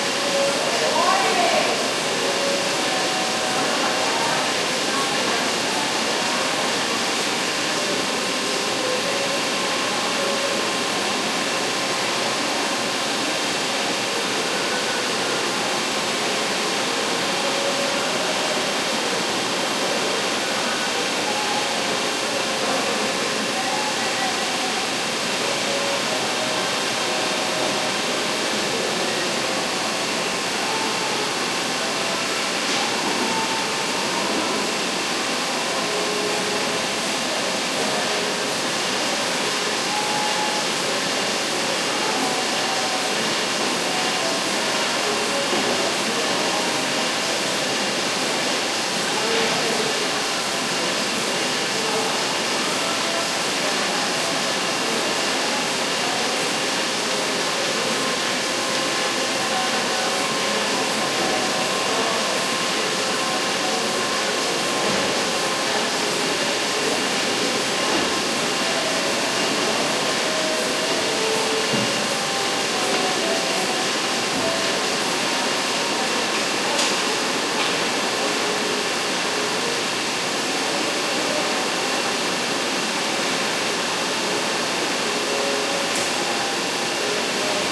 printing room cuba
printing room with some chatter and one printing machine at the far end of the room, recorded from opening to the street. boss came up to me and asked if I had any pens- guess they didn't make enough that year.
cuba, printing, room